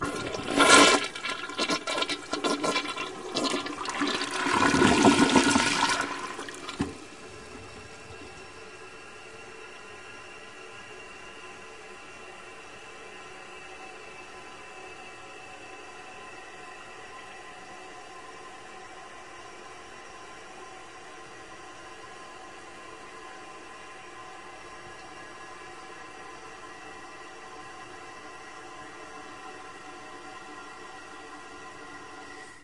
PFRamada201TankOn
Standard flush from a toilet in Pigeon Forge, Tennessee, United States, recorded May 2010 using Zoom h4 and Audio Technica AT-822 stereo microphone.
flush, glug, wet